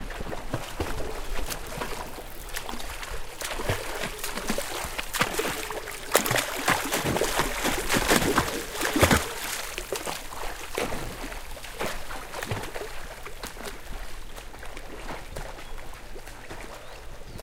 POOL SWIMMING R-L
-Swimming and paddling in pool, right-to-left
paddle, paddling, pool, splash, splashing, swim, swimming, water, waves